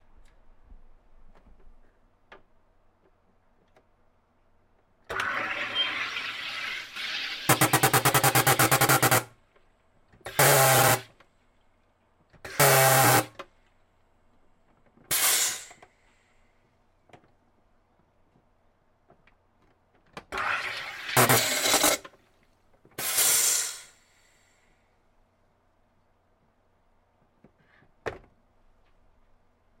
the compression hiss as the soda machine fills the water with CO2, then the pressure release valve kicks in